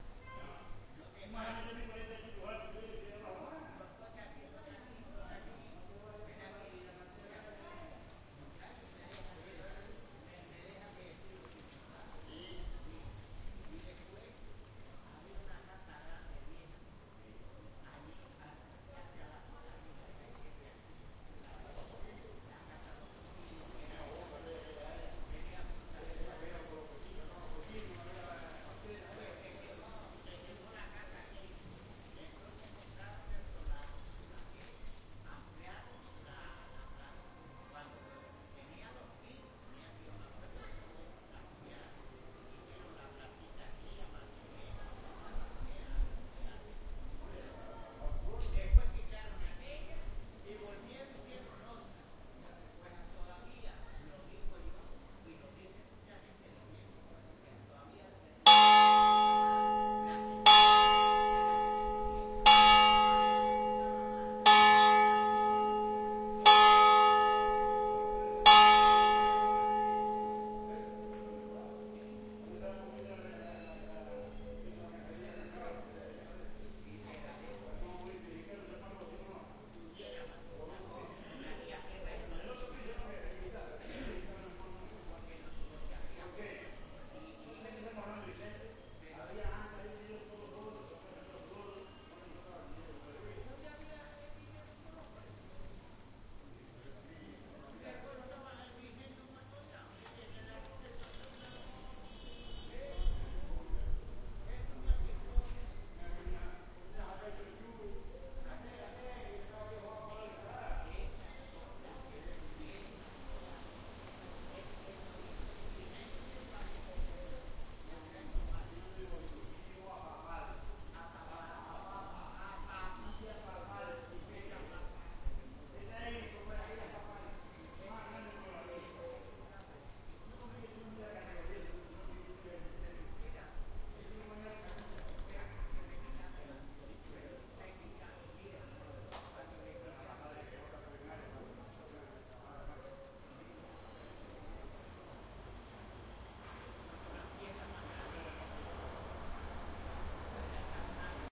Early morning on the town square of Agüimes on the island of Gran Canaria.
The church bell starts tollin 6 o'clock starting at the first minute.
Recorded with a Zoom H2 with the mics set at 90° dispersion.
This sample is part of the sample-set "GranCan" featuring atmos from the island of Gran Canaria.